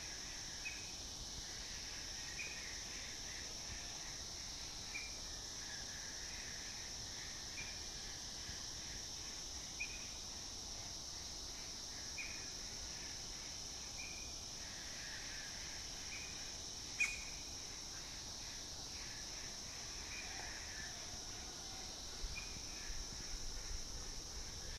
BR 080 Himalaya-naturesounds
Himalayan nature sounds, recorded in Sikkim (a state located in north-est India, just near Tibet).
Here, you can hear typical nature sounds of this region, like cicadas, distant water-stream, birds…
Recorded in september 2007, with a boss micro BR.
ambience, nature